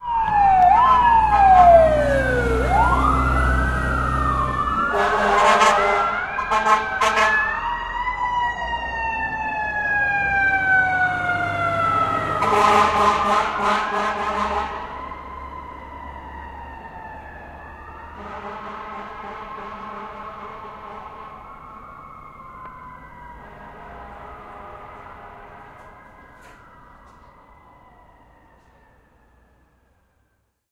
FDNY firetrucks, with sirens, NYC.